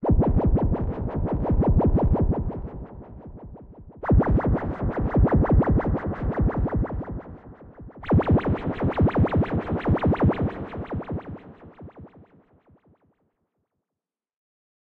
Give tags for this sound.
80s analog eighties hip-hop octave rap record scratch turntable vinyl warp

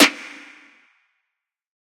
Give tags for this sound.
clean layered eq trap big oneshot snare drums 808 drum sean

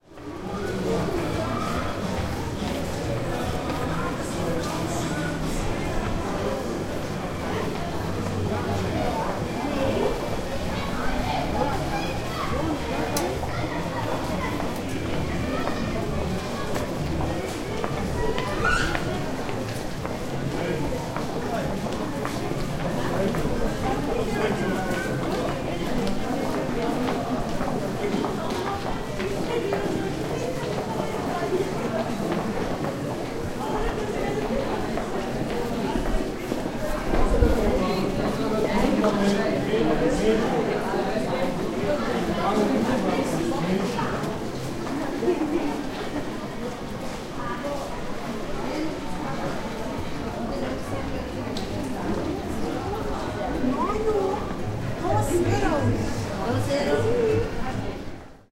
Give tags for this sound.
field-recording mall noise people shopping

